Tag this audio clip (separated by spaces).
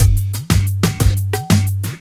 beat drums hip-hop